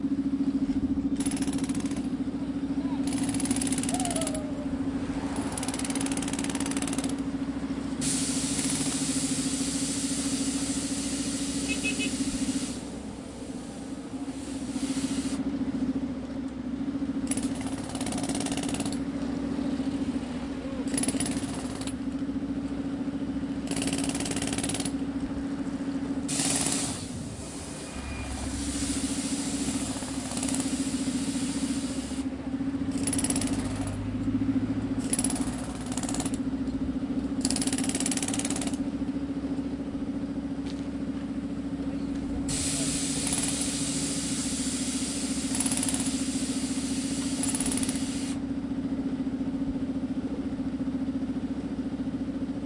patching of the road - jackhammer
Workers at the center of road. Clatter of the jackhammer. Buzz of the compressor. Cars and trolleybuses pass by workers. It blocks sound time after time.
AB-stereo.
Date recorded 2012-09-24
2012, buzz, clatter, compressor, growl, hum, jackhammer, noise, Omsk, road, Russia, Siberia, West-Siberia